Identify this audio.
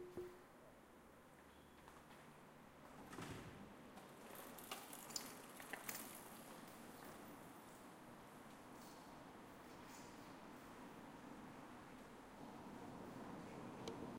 Berlin bicycle passing - mostly sound of bike chain/pedaling, street ambience. Zoom H4n. Stereo.
city, field-recording, street